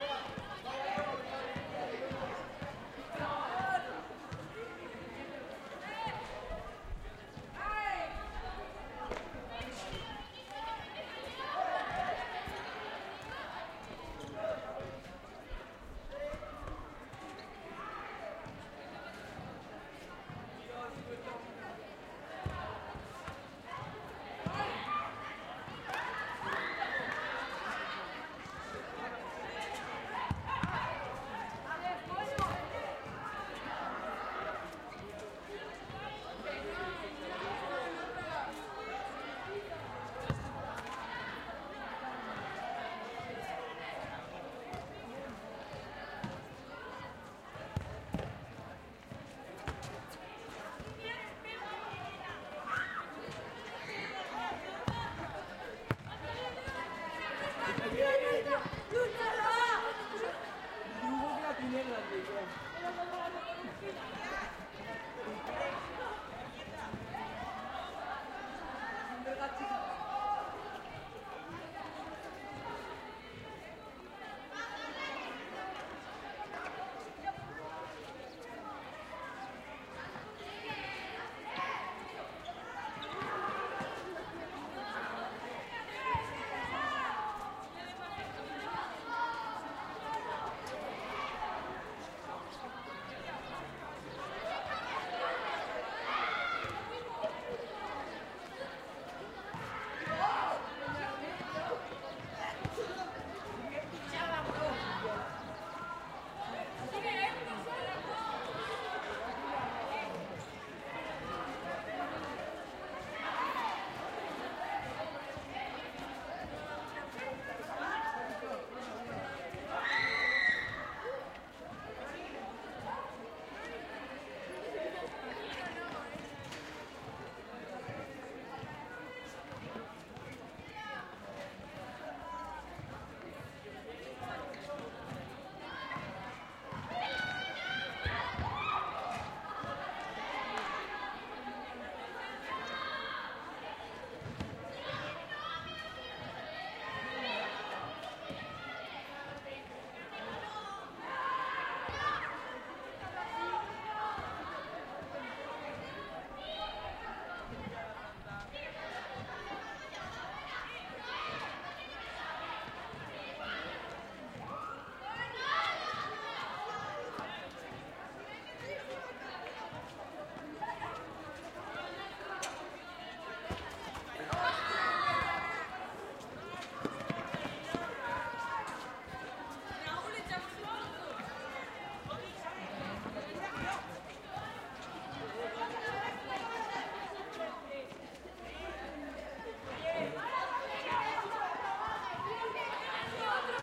Patio del recreo en instituto de Huesca
The sounds of the children playing on a high-school playground during the break.
I used the digital recorder Zoom H6.
shouting, kid, field-recording, playing, break, playground, play, kids, yelling, school-yard, school, children